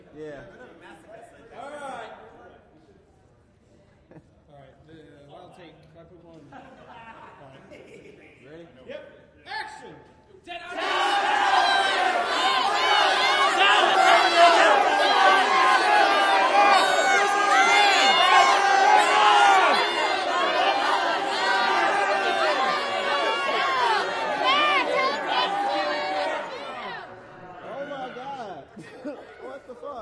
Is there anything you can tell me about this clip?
Riot Crowd Immersed in 5.1 Take 1
1, 5, Crowd, Holophone, Protest, Riot